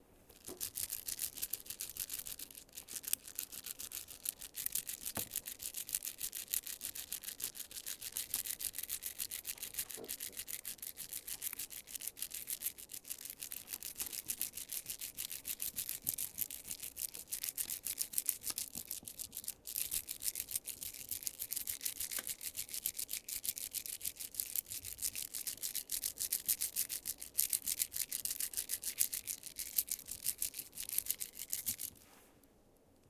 mySound Piramide Eda
Sounds from objects that are beloved to the participants pupils at the Piramide school, Ghent. The source of the sounds has to be guessed.
mySound-Eda, beads-bracelet